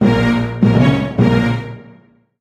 Stereotypical drama sounds. THE classic two are Dramatic_1 and Dramatic_2 in this series.
cinema, tension, film, orchestral, movie, drama, dramatic, suspense, cinematic, laughing